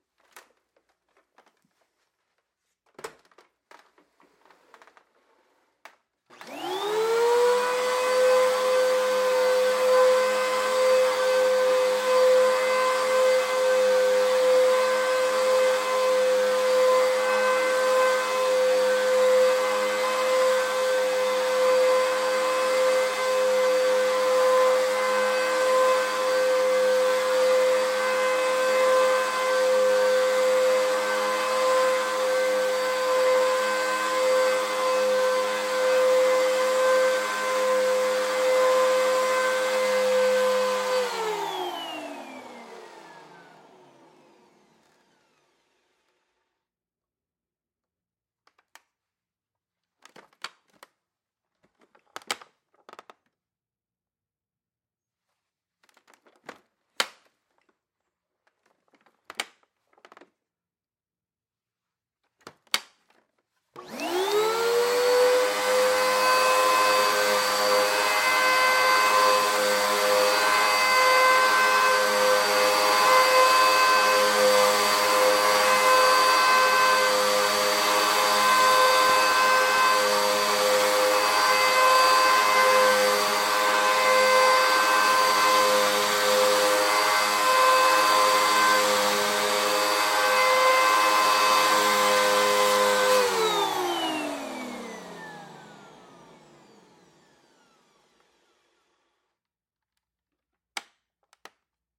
Vacuum cleaner running at 10-feet in a living room, then close up sounds of the vacuum clicking and handling up and down, then close up of the vacuum cleaner running. Antelope Audio Goliath preamp and converters with a Sennheiser MKH50 hypercardioid mic.